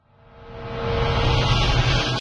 High quality whoosh sound. Ideal for film, TV, amateur production, video games and music.
Named from 00 - 32 (there are just too many to name)
whoosh, swish